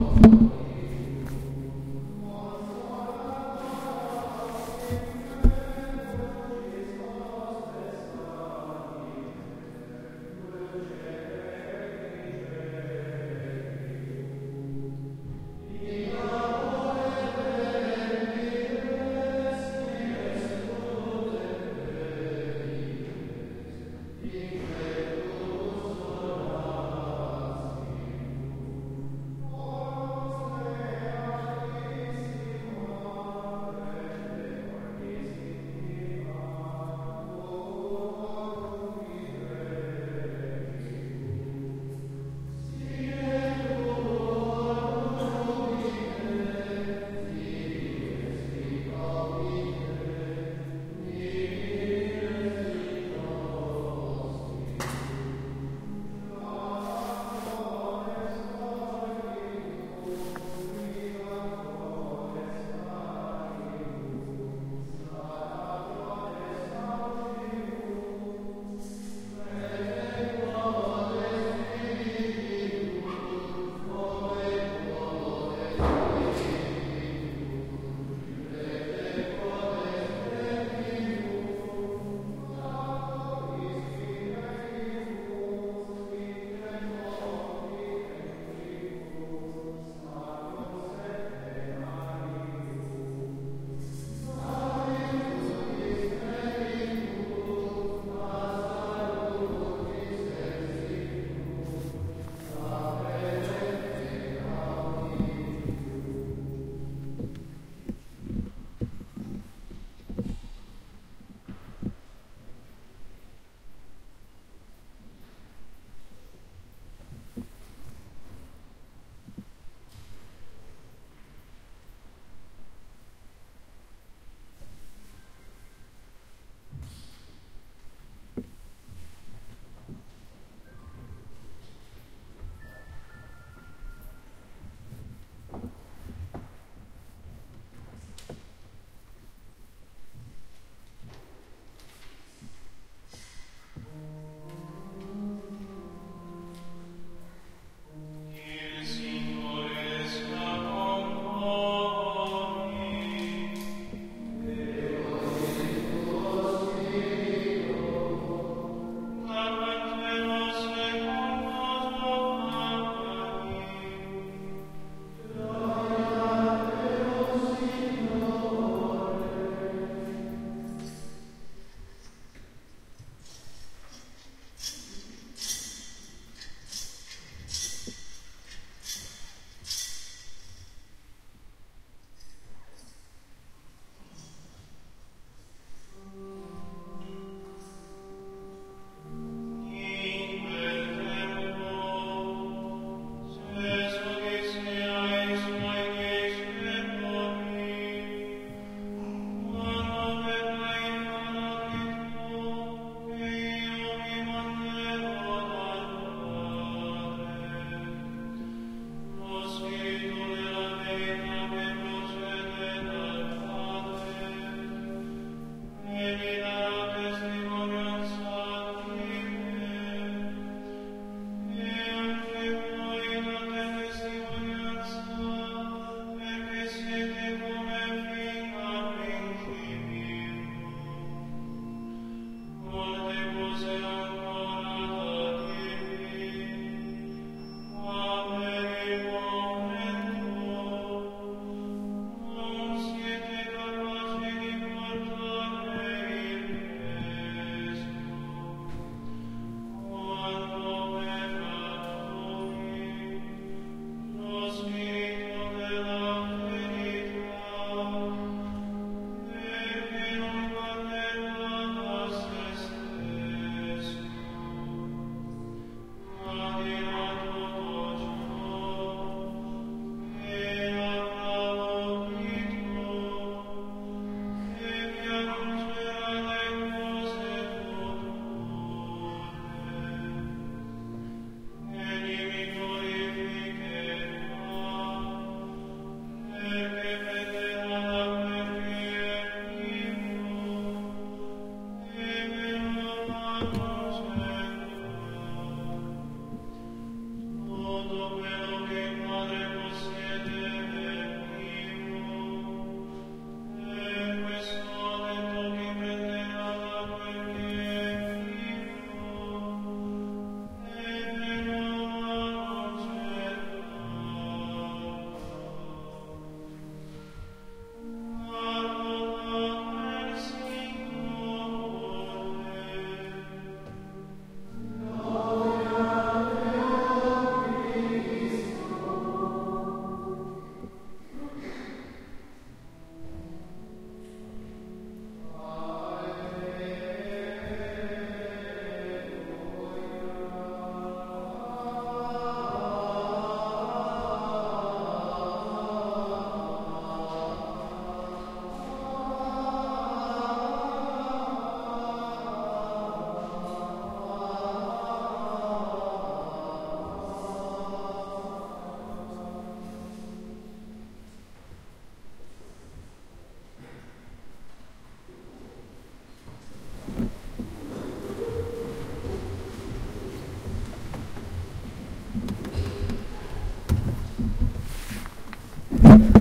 H1 zoom - echoy singing in a church
singing, field-recording, bg, atmosphere, h1, ambience, church, echo, ambient, background, zoom, h1zoom, soundscape